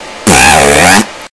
lip oscillation but this time i vocalize it